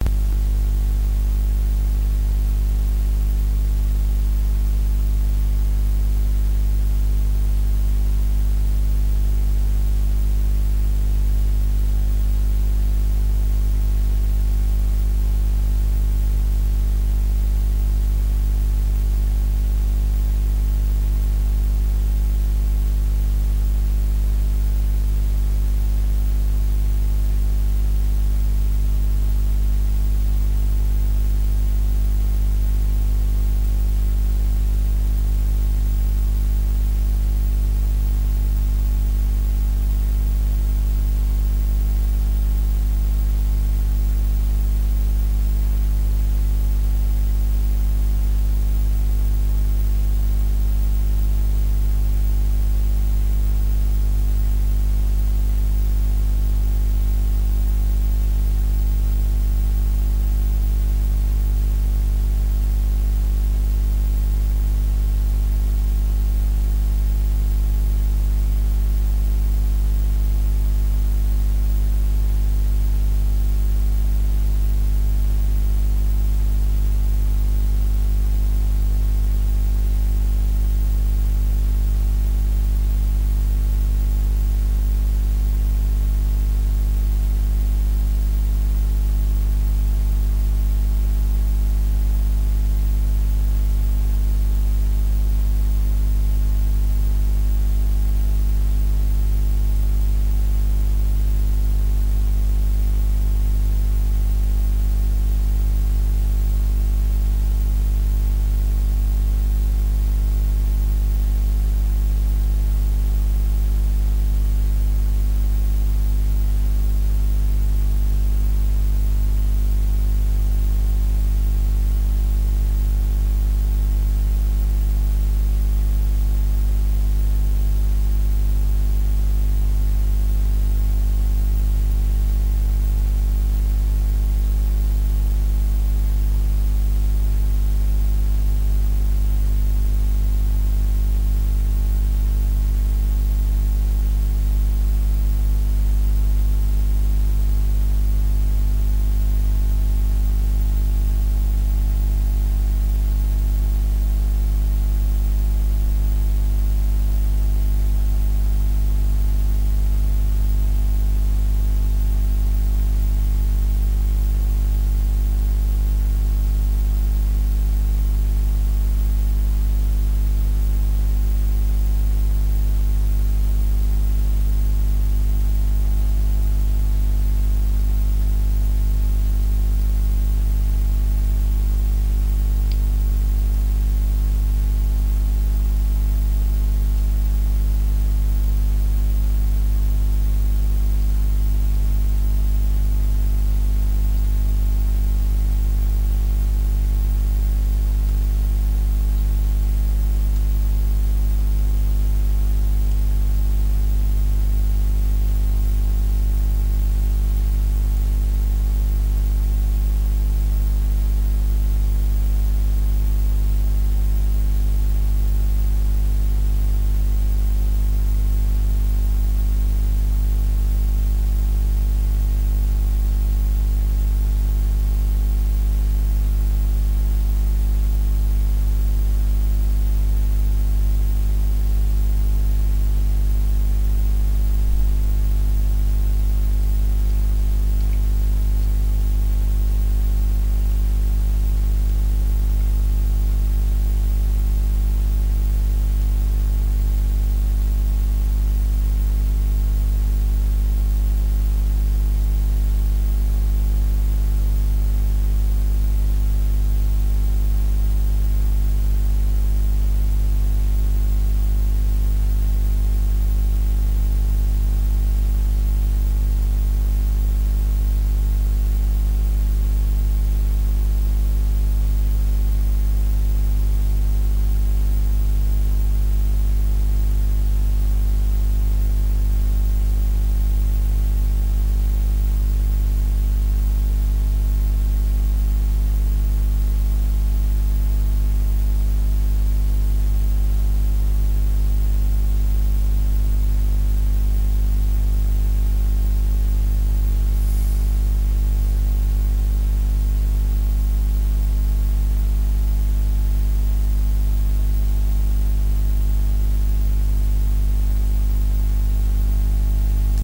ECU-(A-XX)139
Path, ATV, Mirror, Paradigm, Wideband, Beam, Trajectory, Dual, Jitter, Fraser, Field, UTV, Broadband, Alternative, Wireless, Control, Engine, Calculator, Unit, Battery, Sight, Lens, Horizon, Carb, Trail, Streamer, Rheology, ECU, Channel, Sensor